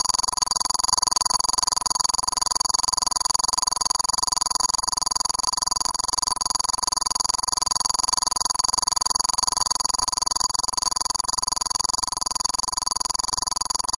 scroll matrix
Scroll sound part similar to one of the parts that make up the Matrix Code (or Green Rain) sound in the film. Synthesized, in MaxMSP.